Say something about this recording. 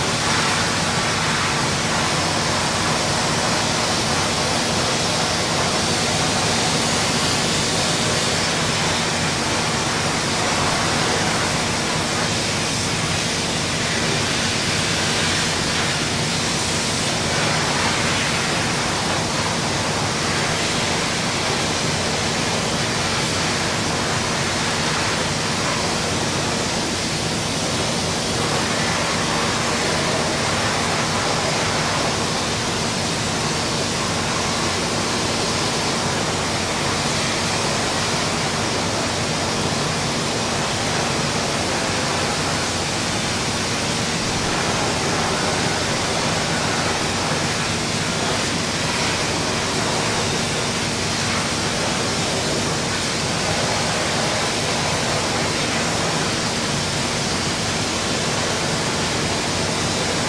noisy industrial factory ambience1
ambience, factory, industrial, noisy